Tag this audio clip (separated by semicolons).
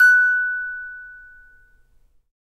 note; box